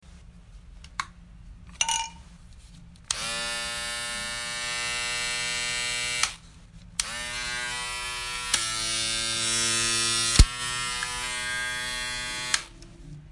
Removal of lid, and switching on and off an electric trimmer/razor with varying modes/speeds.
buzz, clunk, electric, hum, modes, plastic, razor, small, trimmer, variable, variant, varying, vibration